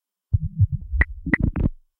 YP 120bpm Plague Beat A09
Add spice to your grooves with some dirty, rhythmic, data noise. 1 bar of 4 beats - recorded dry, for you to add your own delay and other effects.
No. 9 in a set of 12.
glitchcore, data, drums, percussion, 120bpm, 1-bar, dance, glitch, percussive, percs, 120-bpm, urban, uptempo, minimalist, electronic, drum-loop, rhythmic, idm, up-tempo, drum-pattern, beat, digital, loop, 4-beat, minimal, percussion-loop, drum, glitchy, rhythm, noise